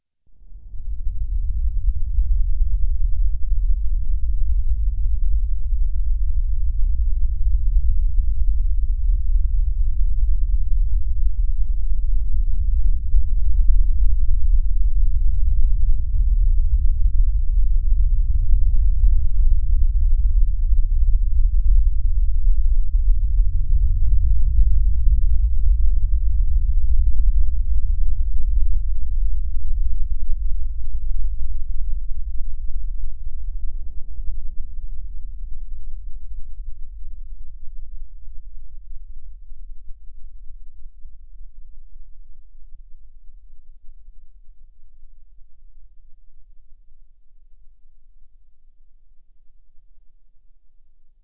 Big Room Ambience 2
This is the sound of a bass drum. I added reverb to it and slowed its speed down about -81 percent. Lots of bass on this'n Made with Audacity and a bass drum.
air-conditioner-going-off-in-a-cathedral, sci-fi, creepy, ambience, big, bass